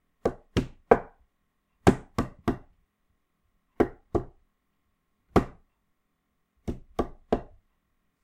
Knocking with palm. Recorded with a Blue Yeti.